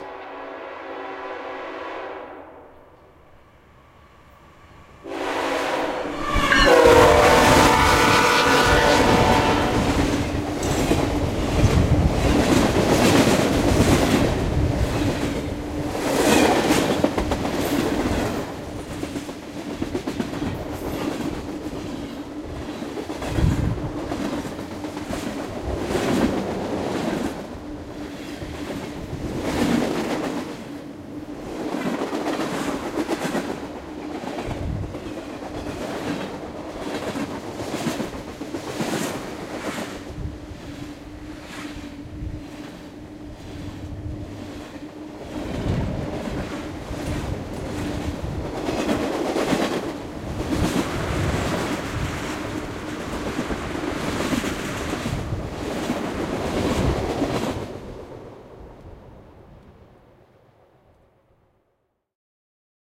FreightFast Horn - Mixdown
Fast moving freight train at Garret Park. Zoom H6n onboard XY mics and 2x AT2020s spaced 18ft apart. Recording hit the compressor but doesn't quite distort.
railroad, freight, train, locomotive